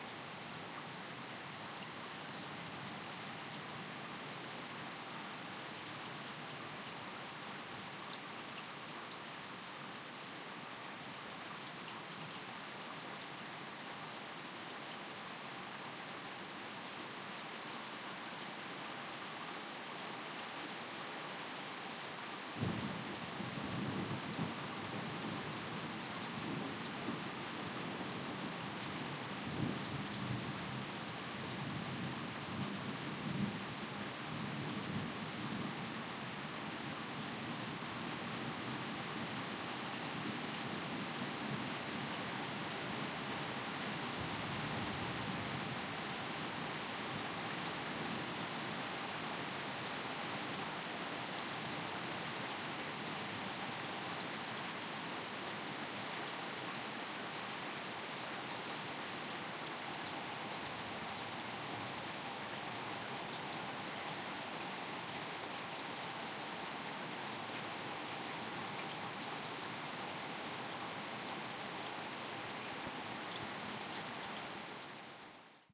Rain and distant thunder
Pouring rain and some thunder in the distance.
field-recording, nature, rain, thunder